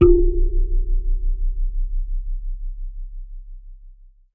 tweezers boing 1
Tweezers recorded with a contact microphone.
close, contact, effect, fx, metal, microphone, sfx, sound, soundeffect, tweezers